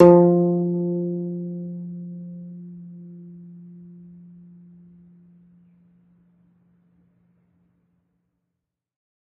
single string plucked medium-loud with finger, allowed to decay. this is string 11 of 23, pitch F3 (175 Hz).